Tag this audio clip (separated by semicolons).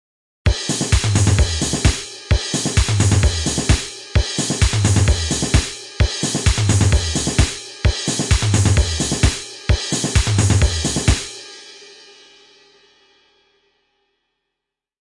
beat; drum; kick; kit; loop; snare